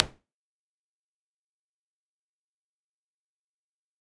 This is an electronic finger snap. It was created using the electronic VST instrument Micro Tonic from Sonic Charge. Ideal for constructing electronic drumloops...